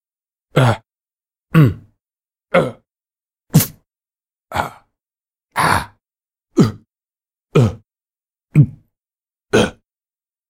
Pretending to suffer pain from colliding with a piece of furniture. Intended for video game character sounds.
Recorded with Zoom H2. Edited with Audacity.
hurt, slap, wound, fall, falling, collision, pain, scratch, punch, man, grunt, aah, argh, guy, painful, boy